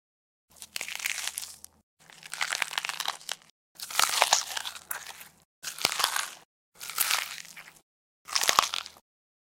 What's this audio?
I made this sound for a short zombie film. It is recorded in studio with a Zoom H4N. Sadly I don't remember which mic I used, but it was close to the source.
Just tell me for what you are using it; I'm curious.